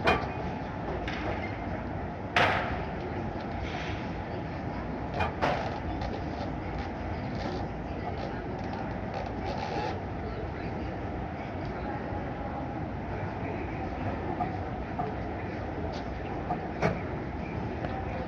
Spire Ambience Industrial
ambience of being inside a large industrial building in the far future
ambience, industrial, science-fiction, space, spaceship